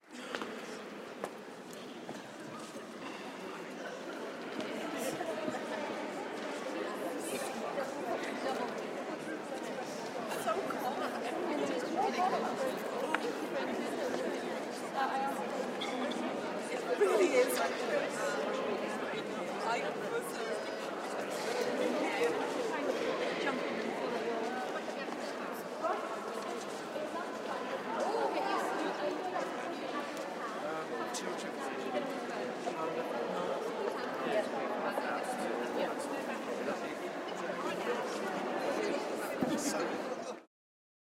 General murmur, echoey space
murmur, quiet, echoey-space, people, audience, echo, hall, chatter